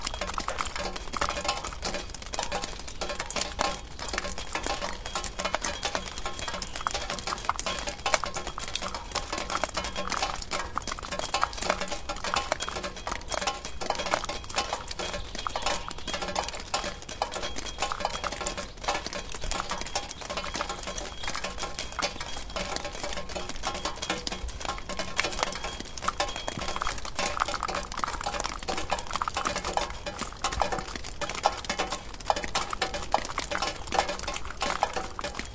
snow-melt on a shed roof, drips from gutter falling onto old rusting car parts.
recorded at kyrkö mosse, an old car graveyard in the forest, near ryd, sweden
drip, drips, field-recording, metal, rhythm, rhythmic, water